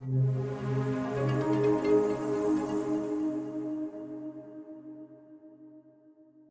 Magic 3.Glitter+Arrival
While making an ambient track simulating a fortune teller's caravan, I designed 3 sounds in Pro Tools by layering and editing presets within Ambience and Soft Pads. They add a bit of magical flare when the tarot card reader turns over a card.
This sound can be used for any kind of transition, item acquisition, quest accomplished, or other quick sound effect which needs a light, magical quality.